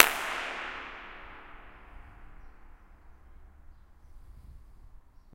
clap at saltdean tunnel 6
Clapping in echoey spots to map the reverb. This means you can use it make your own convolution reverbs